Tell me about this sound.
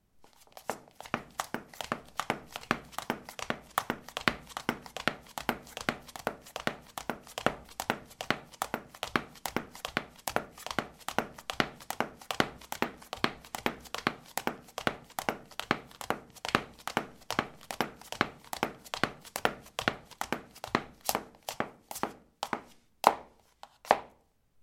ceramic 09c highheels run
Running on ceramic tiles: high heels. Recorded with a ZOOM H2 in a bathroom of a house, normalized with Audacity.
footstep, footsteps, steps